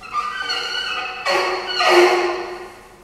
Squ-seq-01
The stools in the operating theatre, in the hospital in which I used to work, were very squeaky! They were recorded in the operating theatre at night.
friction, hospital, metal, squeak, stool